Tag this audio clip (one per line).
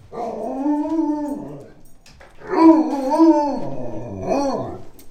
howl
growl
dog
moan
malamute
bark
sled-dog
wolf
husky